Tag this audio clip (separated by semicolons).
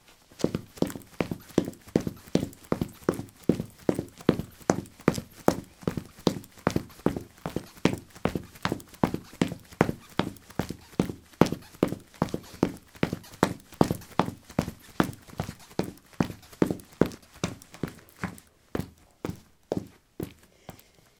footstep; footsteps; step; steps